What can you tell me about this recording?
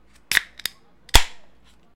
Beer can opening